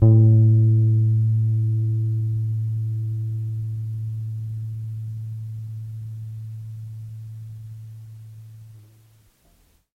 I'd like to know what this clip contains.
Tape Bass 11
Lo-fi tape samples at your disposal.
bass, collab-2, Jordan-Mills, lo-fi, lofi, mojomills, tape, vintage